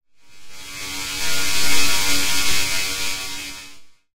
Electrical Transition
Electrical current magic or transition made with own recording, reverb and granular scatter processor.
Edited with Audacity.
Plaintext:
HTML: